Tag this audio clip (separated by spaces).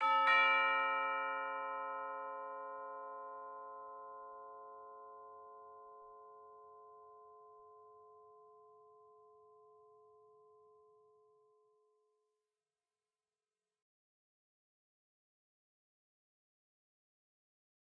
doorbell ring ringing tone ding bell resound chime chiming orchestral chimes tubular note